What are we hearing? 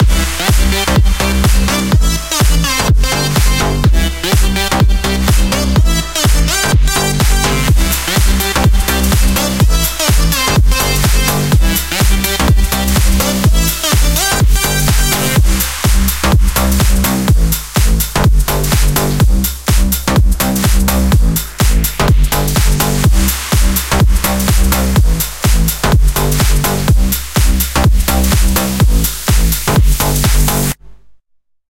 Future Bounce Loop 125 BPM
A random future bounce loop (kind of) I made from scratch in FL Studio today. The lead and one of the basses I layered were made from scratch. I used Sylenth1 and Vital. I didn't fix the limiting/compression problems. Sorry.
electronic, trance, glitch-hop, effect, loop, bass, club, rave, drop, future, dub, fx, dubstep, dub-step, electro, sound, techno, pan, dance, bounce, minimal, house